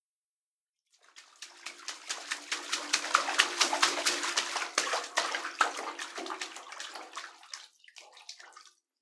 A fish approaching with a fade in
Foleyd in my bathtub with some unfortunate echo